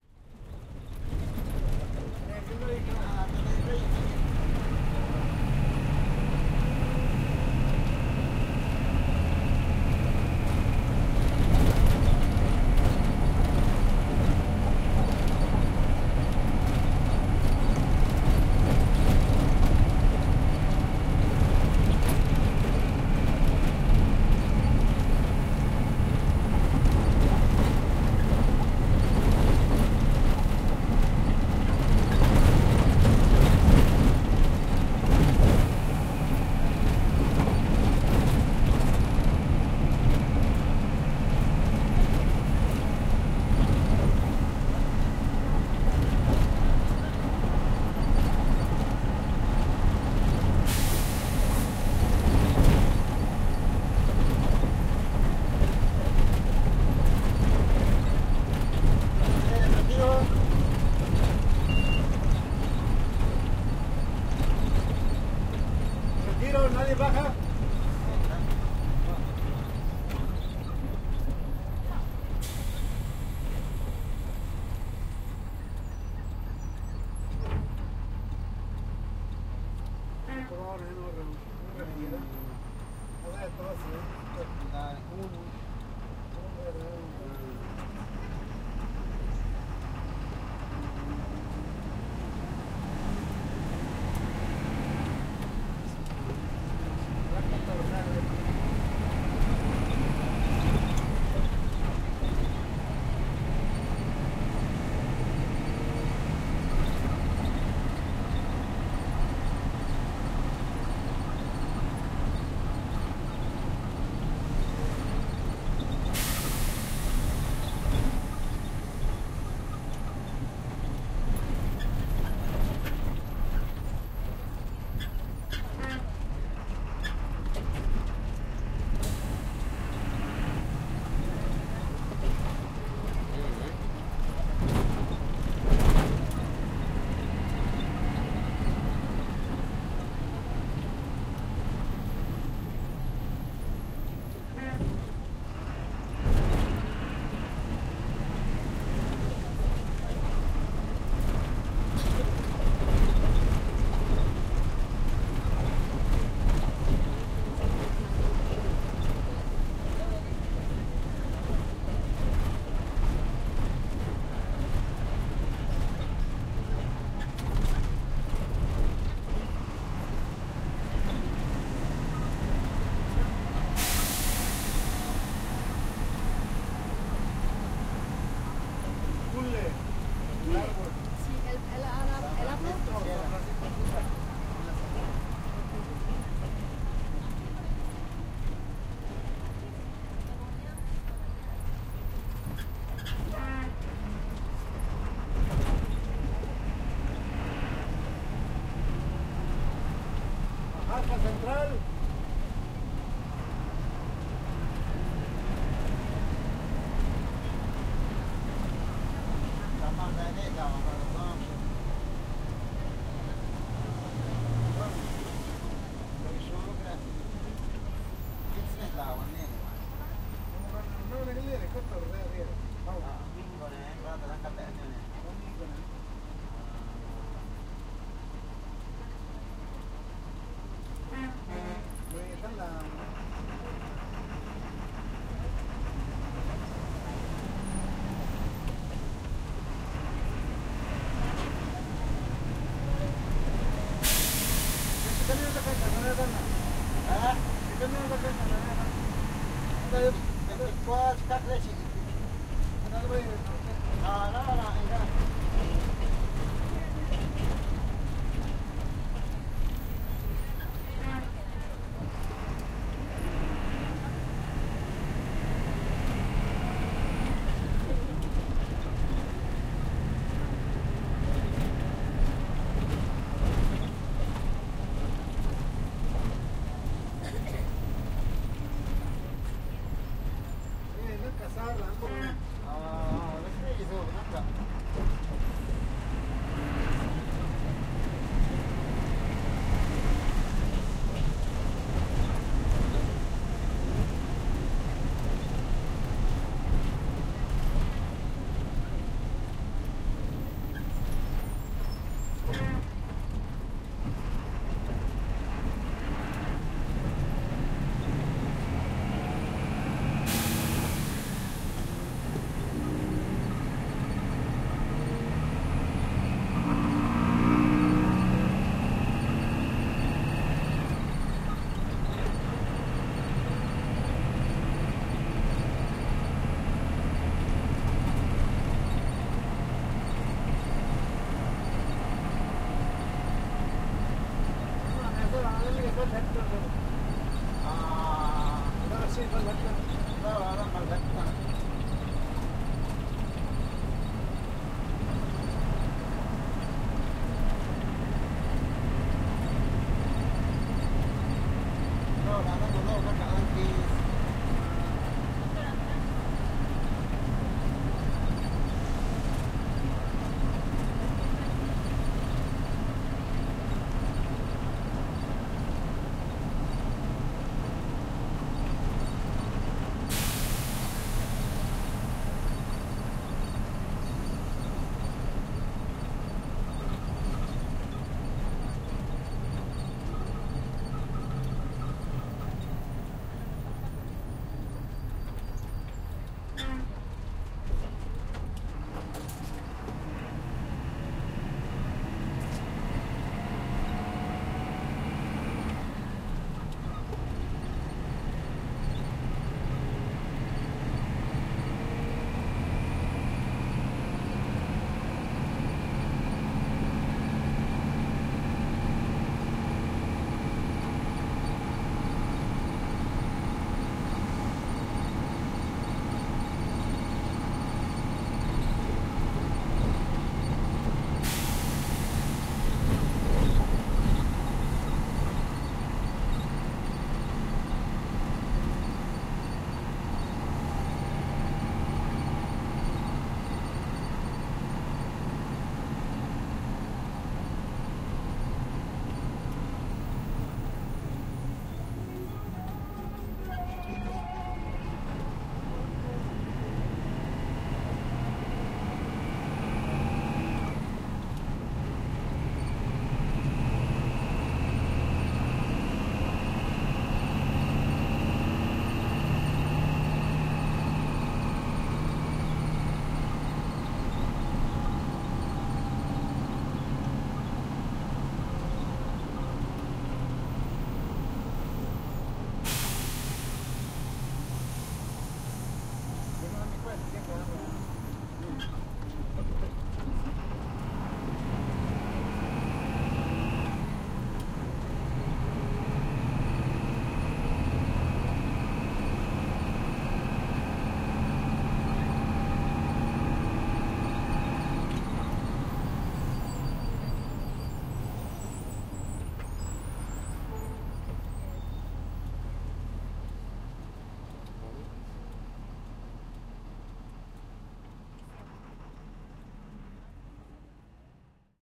Dans un bus au Mexique
In a car in Mexico